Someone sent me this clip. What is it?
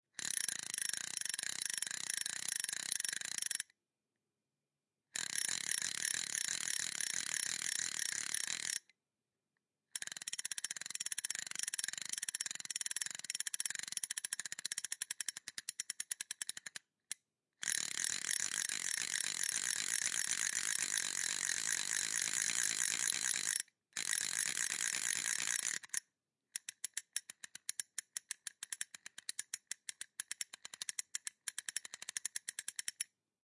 Spinning reel
rotation, reel, rod, spool, fishing, Spinning, bobbin, winding, crackling, turns, Coil, clicks